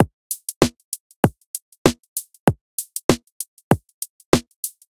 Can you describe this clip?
SimpleBeat97bpmVar1
This is a very basic beat which has some kind of nice groove. I left it rather dry so you can mangle it as you please.
97-bpm, beat, drum, drums, dry, groove, simple, variations